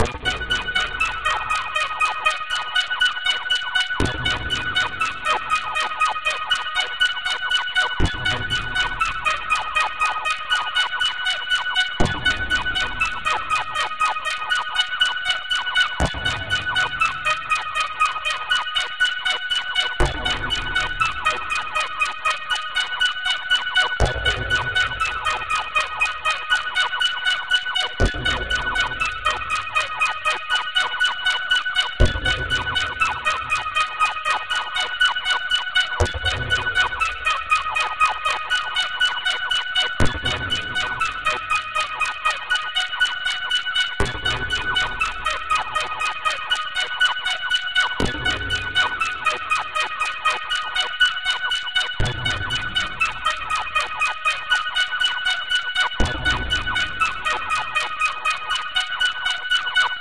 Fool Synth 47
My absolute favorite! It starts with a loose boom followed by spacey and melodic sounds plus rhythmic and random noise in the background.
Made with Ableton Lives Operator plus reverb and beat repeat.
ableton, ableton-live, awesome, live, melodic, noise, operator, rhythmic, software-synthesizer, spacey, synth, synthesizer, synthetic